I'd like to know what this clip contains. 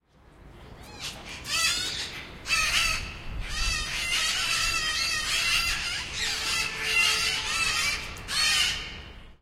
SR006MS
Big aviary with parrots at Neues Kranzler Eck, Berlin